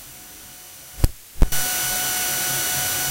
DAT2ANALOG distortion
DAT to analog distortion, typical noise of connector
audio, noise, recorder, typical